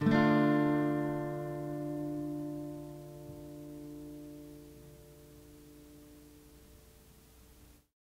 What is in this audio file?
Tape Ac Guitar 8
Lo-fi tape samples at your disposal.
Jordan-Mills, lo-fi, tape